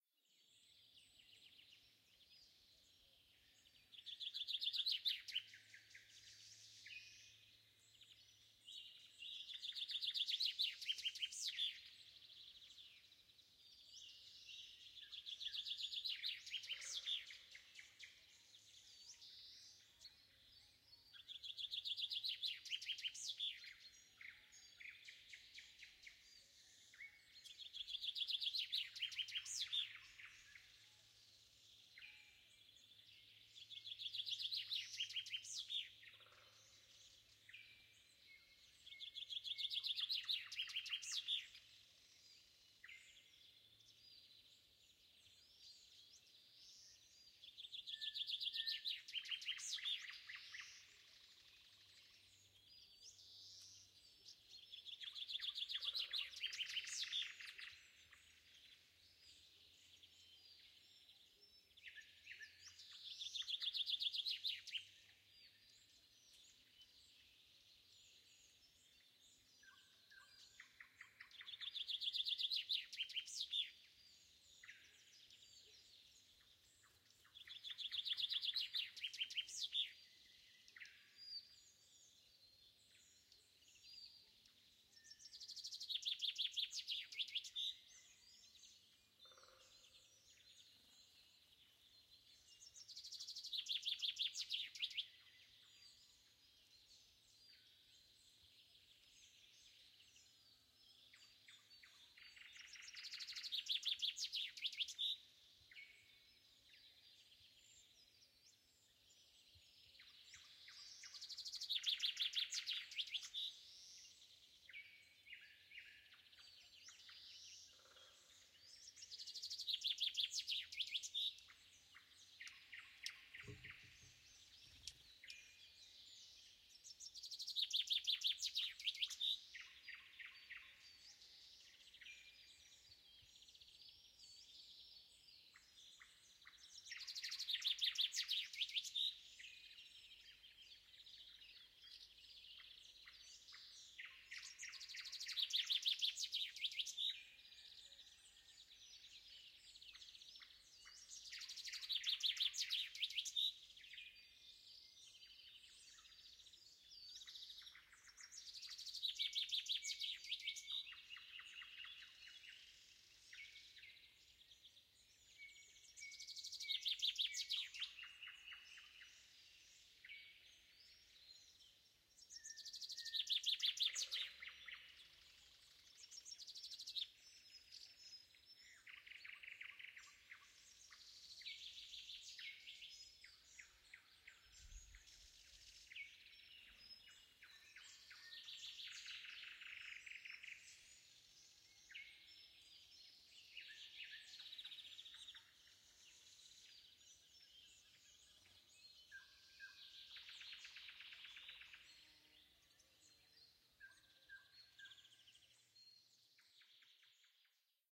shrike nightingale sunrise
This review contains a transcript of the sounds of one overnight recording session featuring bird songs, cricket choirs, wind blowing, and other sounds of nature.
These soundscapes were recorded during spring in the depths of a mixed forest where a set of microphones captured a stereo panorama. The captured soundscapes are that of a meadow with a diameter of about 100 meters that produces a multi-level echo and deep reverb.
The nature concert opens with a nightingale recorded around midnight who tirelessly varies its song for an hour until it was frightened off by a creature who made a distinct rustle of foliage not far from the bird. The nightingale sings from the bush located on the left while the recording is balanced by the choirs of crickets audible, for the most part, in the right channel. In the center of the stereo panorama, you can clearly hear the wind sir the crowns of tall trees and then gradually subside towards the end of the track.